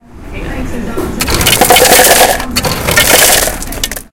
This is a recording of someone dispensing ice from the fountain drink machine at the Coho. I recorded this with a Roland Edirol.